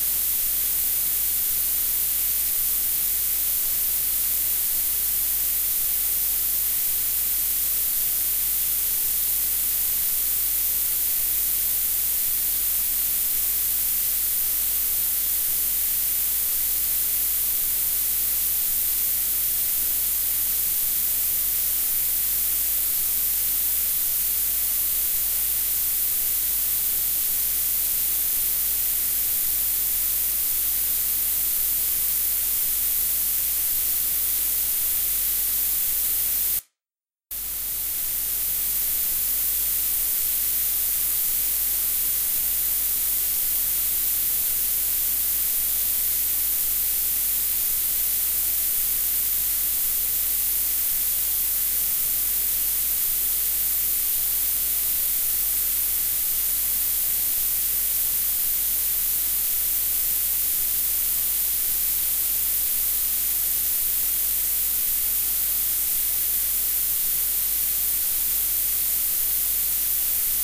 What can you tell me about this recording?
This is just static noise. Recorded from radio station web stream that has not started it's program yet, but the stream is up. Normalized to about -10 dB with audacity.